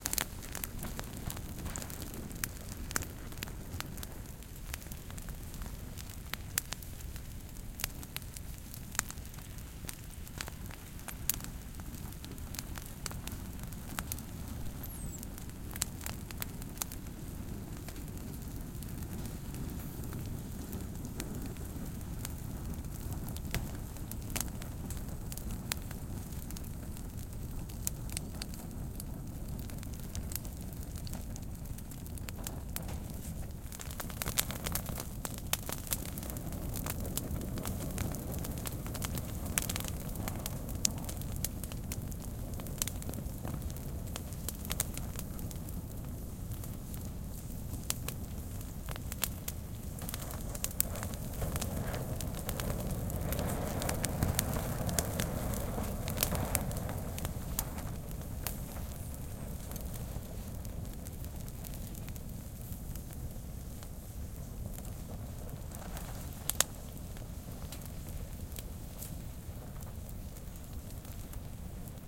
brushwood in the fire 1
Small fire from the brushwood.
Edited and normalized.
brushwood, burn, burning, crackle, crackling, crisp, fire, firewood, flame, flames, hiss, outdoors